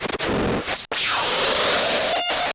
an angry synthesized dog and cat going at it.
TwEak the Mods
acid, alesis, ambient, base, bass, beats, chords, electro, idm, kat, leftfield, micron, small, thumb